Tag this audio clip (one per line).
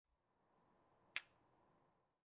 water
clink
tea-cups